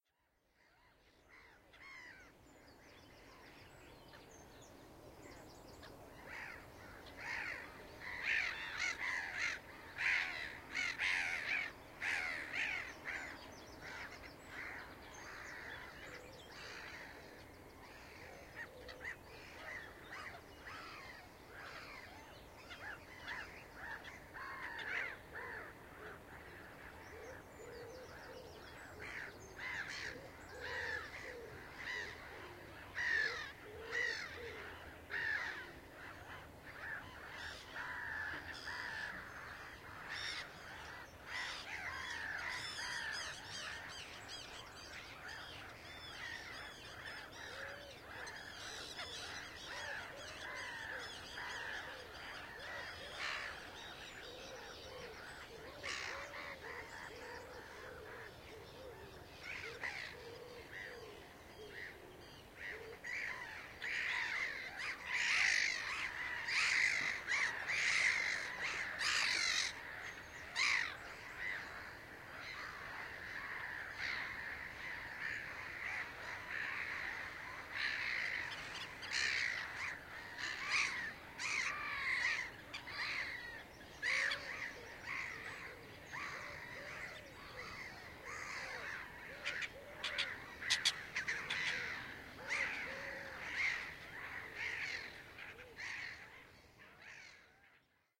Gulls on The isles of Scilly
Recording of gulls on the small island of Gue, Isles of Scilly. Uk. Species not noted. Some background noise from the atlantic ocean. Edirol R-09hr